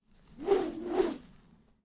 Bamboo Swing, D3
Raw audio of me swinging bamboo close to the recorder. I originally recorded these for use in a video game. The 'D' swings make a full 360-degree circle.
An example of how you might credit is by putting this in the description/credits:
And for more awesome sounds, do please check out my sound libraries.
The sound was recorded using a "H1 Zoom recorder" on 18th February 2017.
whooshing, woosh, whoosh, swish